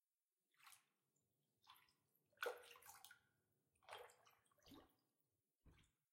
Water Spashing One Shot
Water dripping in a cave or underground temple
cave, drip, dripping, drop, drops, water